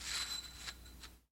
Tape Misc 7
Lo-fi tape samples at your disposal.